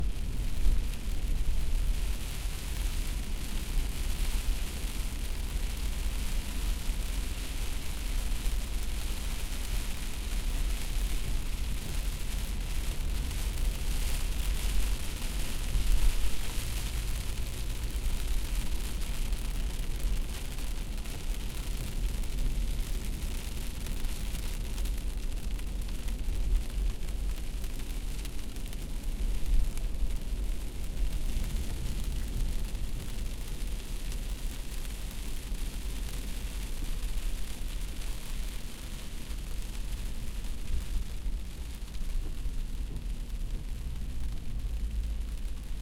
Hard Rain in Moving Car
Drops of rain on a glass windshield while driving. Recorded with a Zoom H4n using onboard stereo mics. Multiband compression and amplification added in Adobe Audition. Captured inside my Toyota 4Runner on a rainy night.
water, shower, wet, drops, raindrops, windshield, weather, drive, rain, driving, car, windscreen, droplets, inside, raining, SUV, drip